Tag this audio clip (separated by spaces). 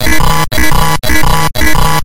bytebeat cell-phone cellphone phone ring-tone ringtone sonnerie